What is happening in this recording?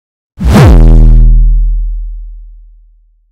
HK noiseOD5
distorted, blown-out, drum, bass, overdriven, kick, oneshot, noise, percussion